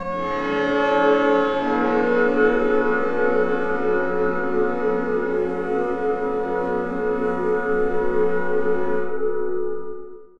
A choir and a violin sample with heavy effects